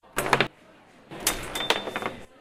This sound is when someone kicks the ball in the “Futbolin”.
UPFCS12, cafeteria, campus-upf